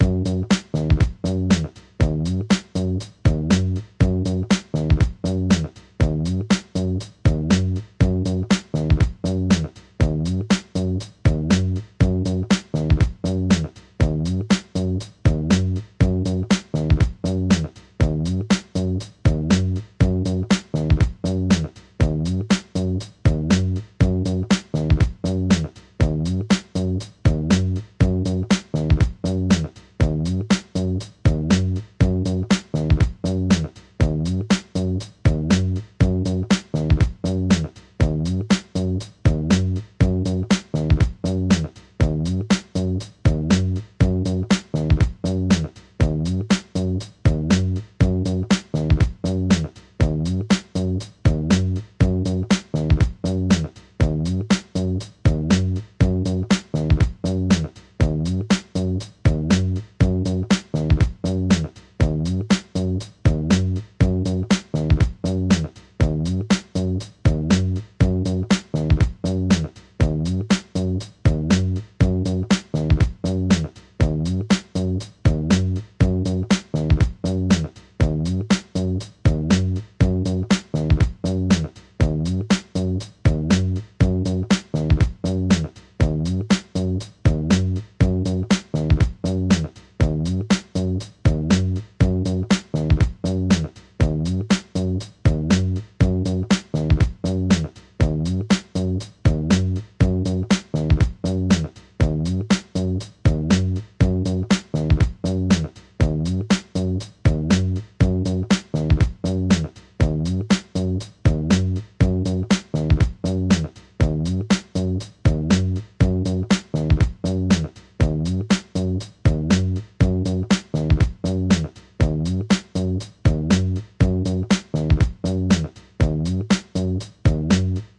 Bass loops 088 with drums long loop 120 bpm
120, 120bpm, bass, beat, bpm, dance, drum, drum-loop, drums, funky, groove, groovy, hip, hop, loop, loops, onlybass, percs, rhythm